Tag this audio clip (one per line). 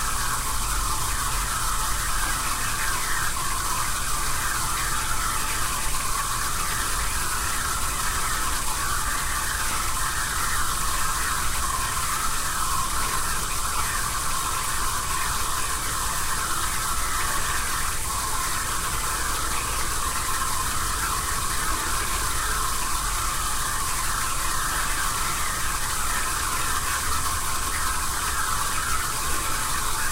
plumbing
water